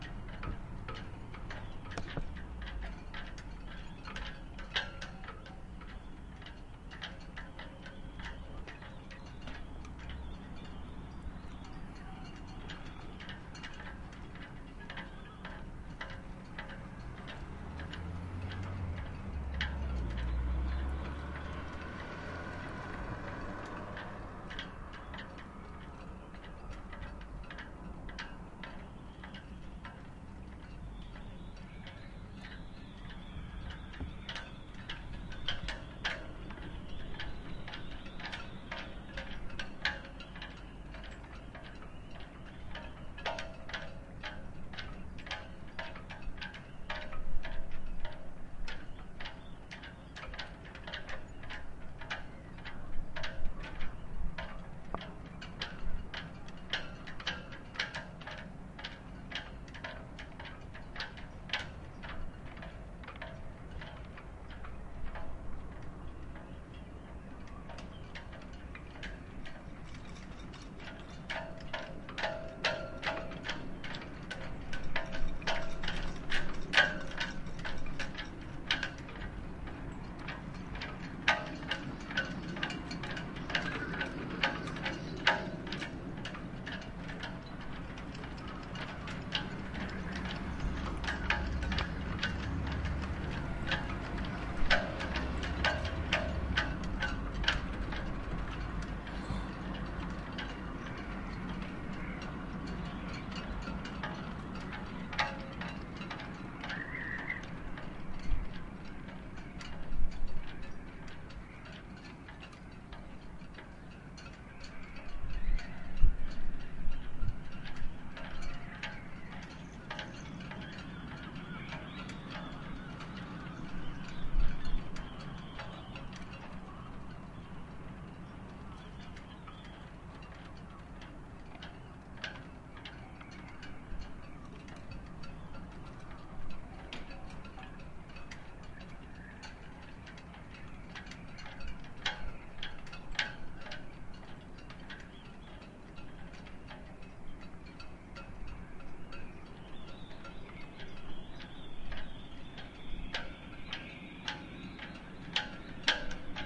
wind on lanyards
windy day by the sea yacht rigging knocking and lanyard singing
wind, yacht, lanyards, sailing